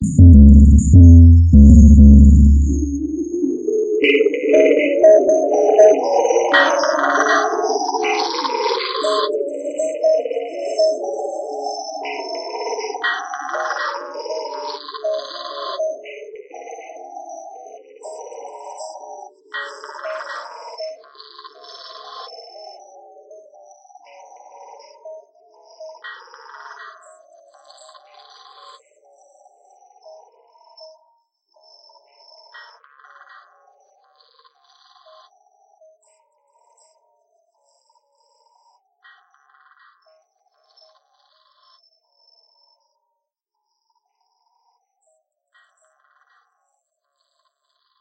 DISTOPIA LOOPZ PACK 01 is a loop pack. the tempo can be found in the name of the sample (80, 100 or 120) . Each sample was created using the microtonic VST drum synth with added effects: an amp simulator (included with Cubase 5) and Spectral Delay (from Native Instruments). Each loop has a long spectral delay tail and has quite some distortion. The length is an exact amount of measures, so the loops can be split in a simple way, e.g. by dividing them in 2 or 4 equal parts.